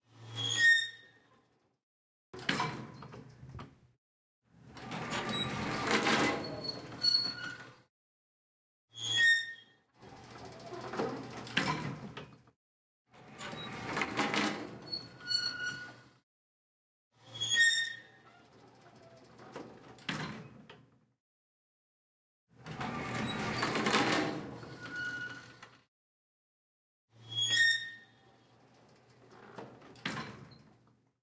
Elevator Doors 2
Old elevators doesn't just make metallic creaks or squeaks for annoyance, but to proudly reveal how long it still kept going. Annoying as it sounds (pun intended), they still work in service!
(Recorded using a Zoom H1 recorder, mixed in Cakewalk by Bandlab)
Close, Door, Elevator, Metal, Open